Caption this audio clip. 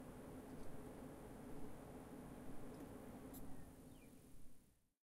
Computer Shut Down
Shutting down of a desktop computer
Computer, CPU, Fan, Gadget, Office, PC, Peep, power, Power-off, shut-down, Technic